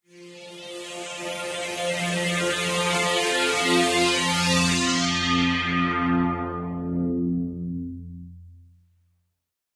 GremlinSineWTPadF2160bpm
Gremlin Sine WT Pad F2 - Wavetable synthesis with the synth of the same name by Ableton. Using the formant gremlin and harmonic sine wavetables and modulating the lfo speeds of the scanning wavetable to give it that slowing down effect. Followed by some light chorus and mid side eqing